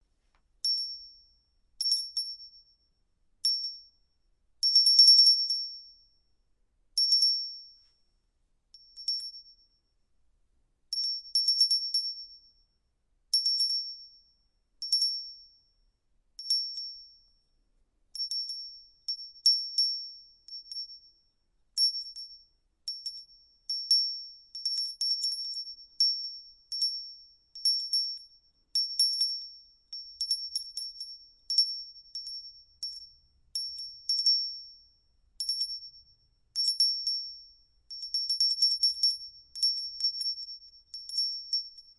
Studio recorded bell microphone schoeps Ortf mixed with Neuman U87
Foley, Studio, Bells